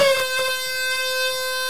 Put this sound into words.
noisy printer sample, that i chopped up for a track of mine, originally from..
user: melack
Beep, Horn, machine, mechanical, Printer-beep, robotic